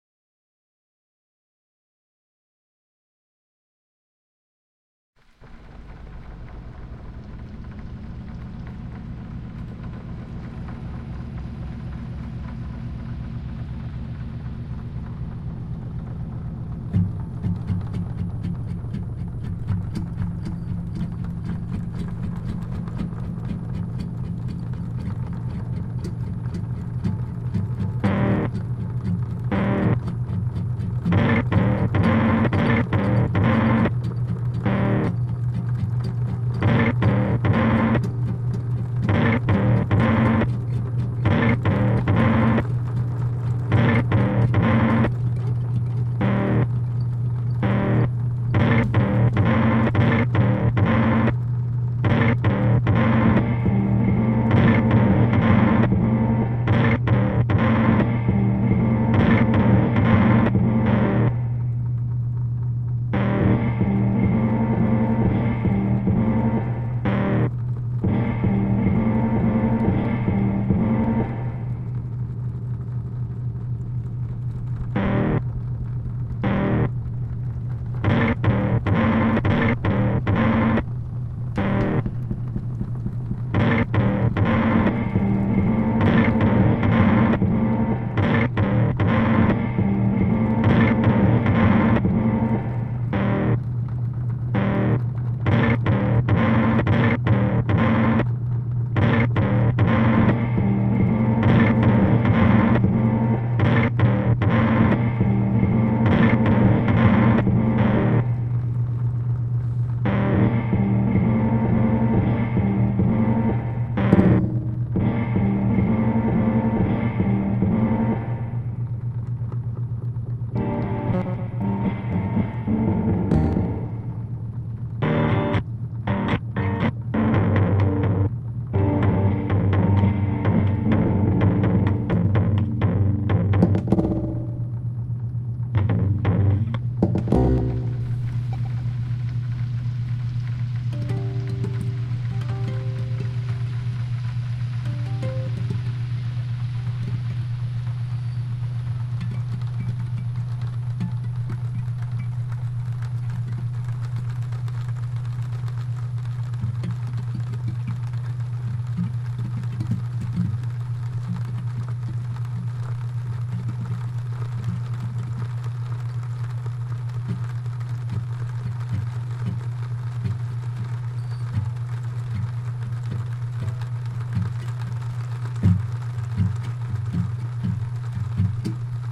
Amir - Sound Design Project i (again)
An abstract sound design project for school. Recording devices: DR-40
Editing software: Logic pro 9